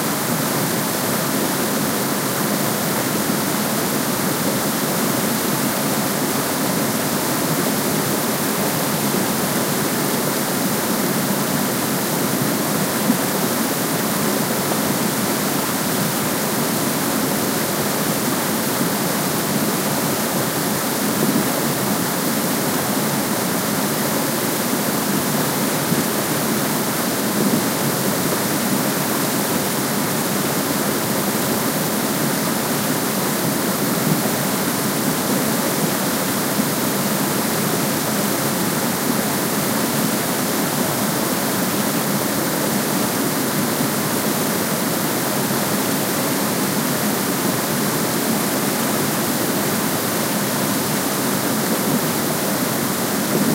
Heavy waterfall sound from Fairmount Park in Philadelphia. I recorded from several angles and then mixed them into one stereo recording, trying to bring out some of the odd sounds under the general white noise. Loops.
field-recording, water, waterfall, white-noise